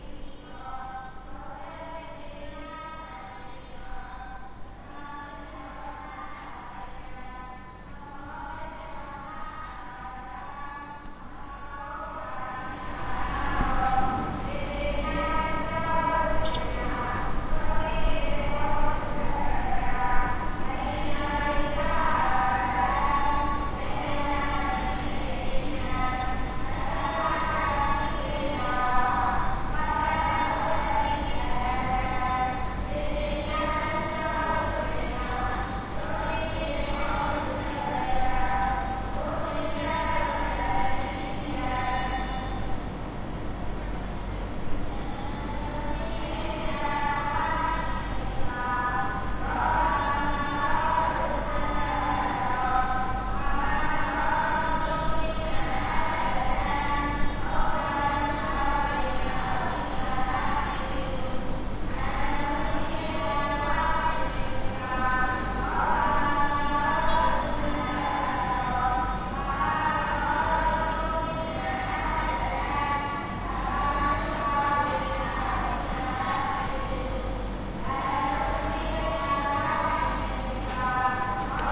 Yangoon street at night
Recording on the street at night in Yangoon, with insect sounds, cars and megaphone chants
Night, Street, Yangoon